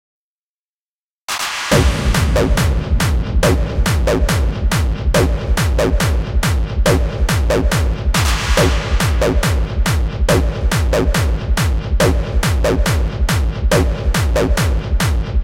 This little loop contains a lot of juice. The kick alone has 2 Parametric EQs a band Compressor and a Overdrive distortion for the hard effect and no VST's were used, all the filters and effects caused my computer to run very slow I could barely play the track.
303, acid, gate, hardstyle